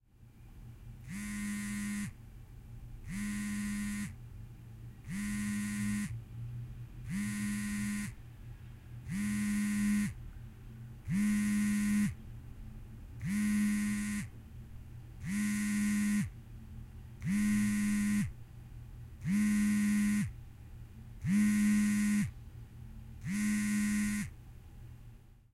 Cell Phone Vibrate
University, Elaine, Koontz, Park, Point